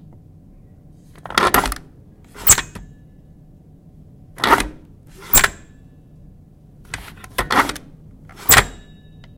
Using a 3 hole punch. Recorded on ZOOM sound recorder.
mechanical, office, holepunch, paper